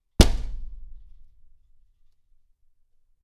Windows being broken with various objects. Also includes scratching.
break, breaking-glass, indoor, window